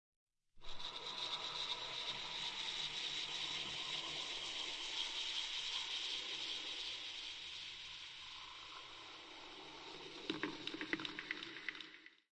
fidget spinner night effect
effect, fidget, night, spinner